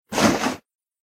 Inventory Open
A backpack opening, the fabric rustling. Could be used as an inventory sound in a game, or just as a cloth sound. This is one of my first sound recordings and is how I got into recording.
Recorded 8th May 2018 with a Samsung Galaxy Tab A.
Edited four times in Audacity, with the latest being the 21/04/2021.
inventory, fabric, rustle, open, sack, cloth, pack, gear, backpack, close, bag